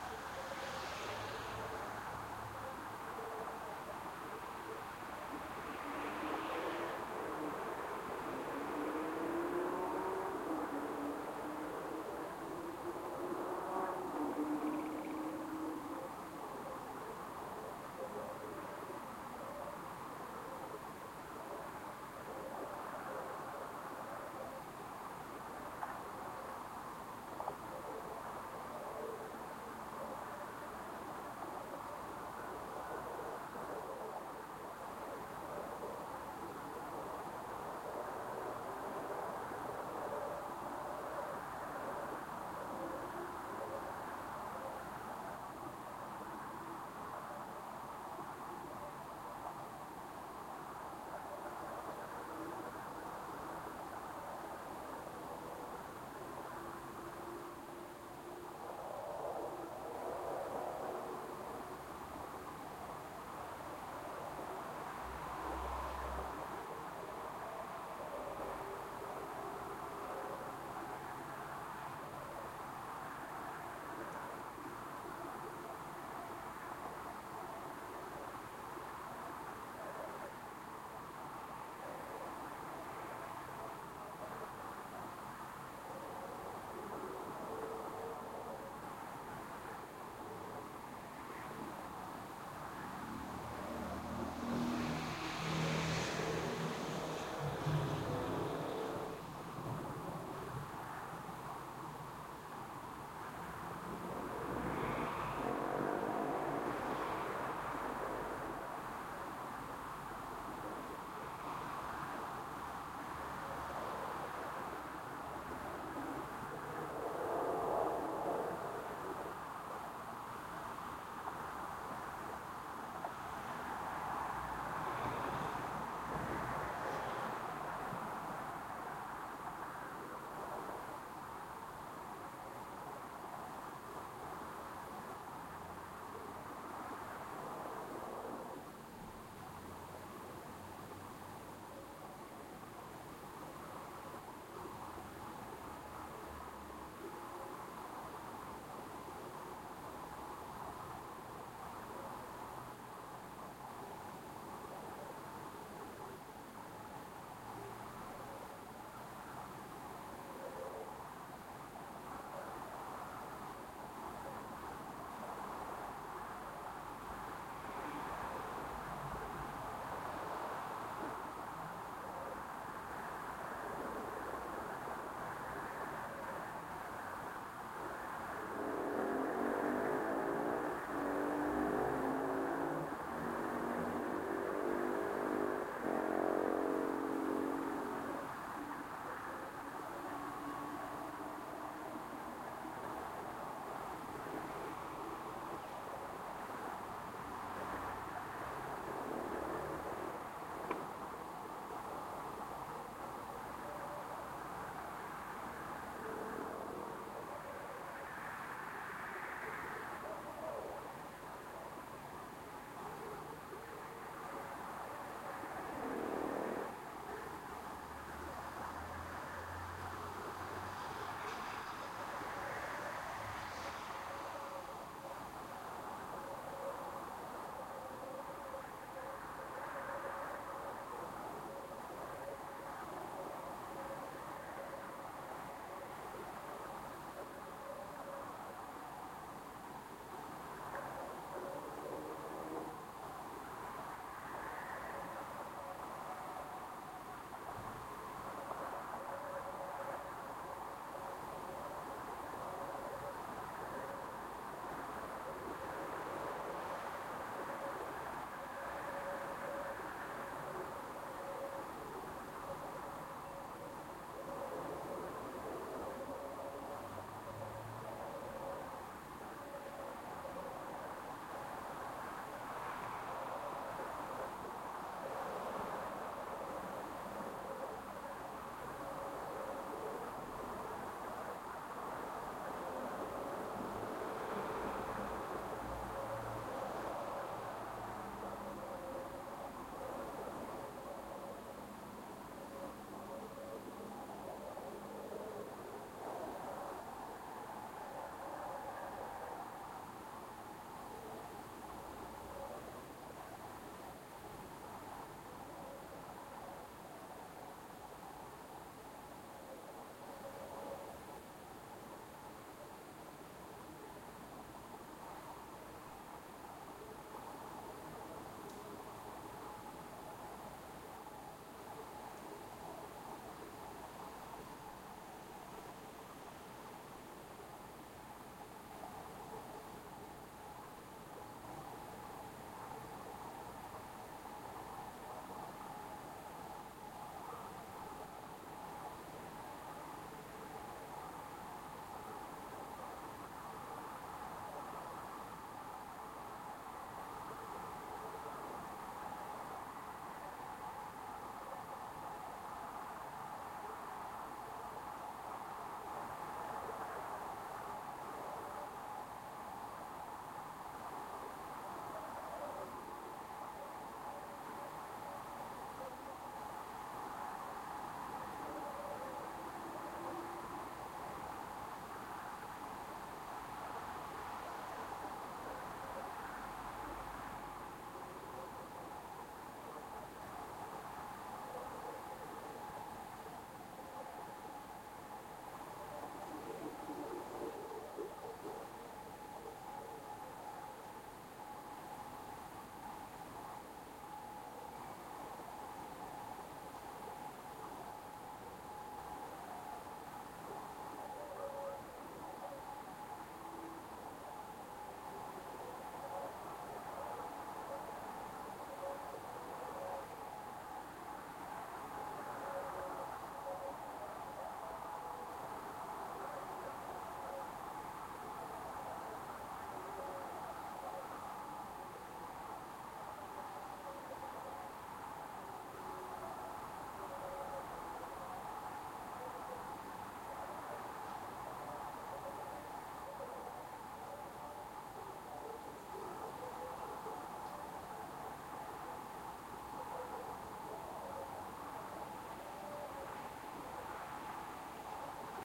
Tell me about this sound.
Ambience suburban night distant-traffic air some light movement
Low density urban aka suburban night time ambience including distant traffic and some light movement.
Recorded in South Africa on the internal mics on a Tascam DR-07.
air, ambience, ambient, atmosphere, city, distant-traffic, external, field-recording, general-noise, light, movement, night, some, soundscape, suburban, traffic